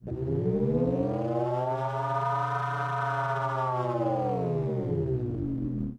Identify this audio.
sound of my yamaha CS40M
synthesiser, fx, sound, sample